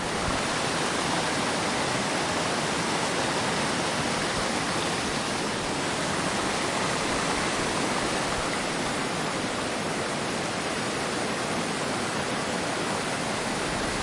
Zoom H1. Waterfall at lower plitvice lakes